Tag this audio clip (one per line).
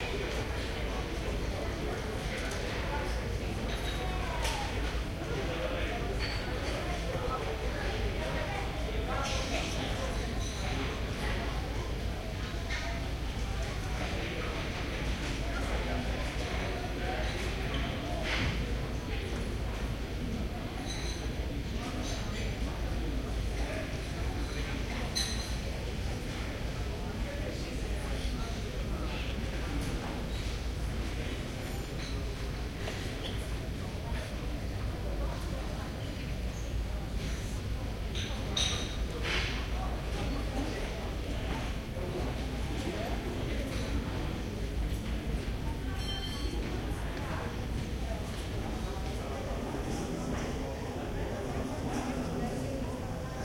Cruiseship
dishes
indoor
voices
field-recording
people
atmosphere
chatting
ambiance
footsteps
hall
cafeteria
ambience
laughing
soundscape
glasses